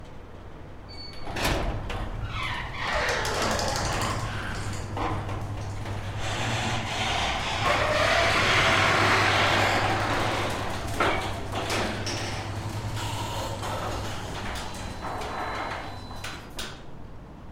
garage door opening - recorded from outside